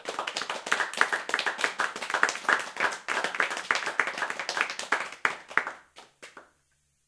Applause - 5/6 persons - 3
A small group applauding.
{"fr":"Applaudissements - 5/6 personnes - 3","desc":"Un petit groupe applaudissant.","tags":"applaudissements groupe"}
applause, fast, audience, cheer, clapping, clap, group, crowd